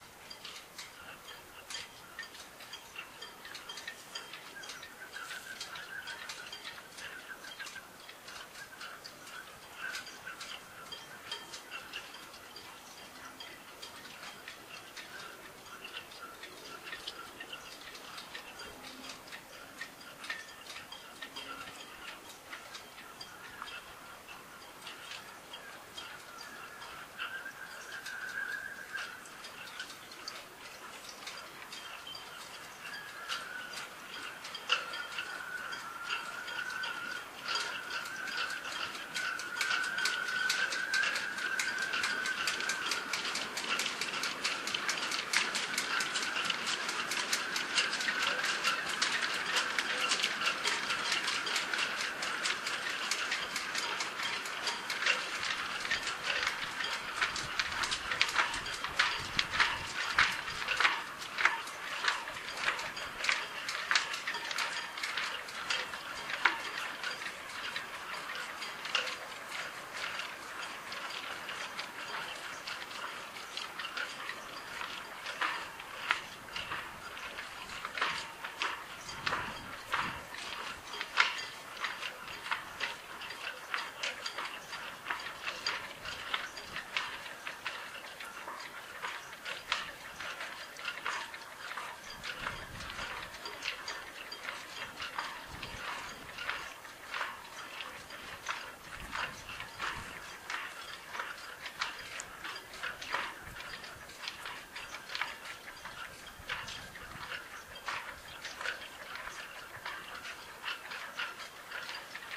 using homemade dead kitty w/ Oly WS321m, sounds of sailboat masts clanking in the wind whistling, ropes slapping, etc. and of course hiss.